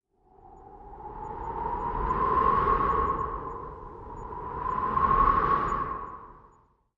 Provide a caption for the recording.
LIGER Romain 2018 2019 HardWind
To make this sound, I record myself blowing near a Zoom H1. Then in post in Audacity, I normalize the track at -1dB, I slow it down a little bit and finally add some effect like a low pass filter and a reverb to manage a deeper sound.
Selon la typologie de schaeffer, ce son est un son continu complexe (X)
Morphologie :
- Masse : son seul complexe
- Timbre harmonique : doux
- Grain : le son parait légèrement rugueux
- Dynamique : l’attaque du son est graduelle
- Profil mélodique : variation serpentine
- Profil de masse : son filtré
tempest, ambiance, cold, Wind, record, blow